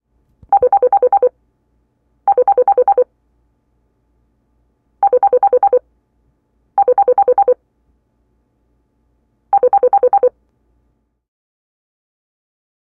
digital phone ring bip
Digital phone ring